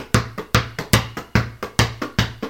anahel balon2 2.5Seg 3
bouncing, bounce